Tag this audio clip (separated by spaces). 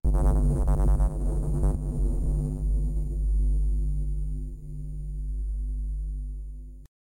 parts remix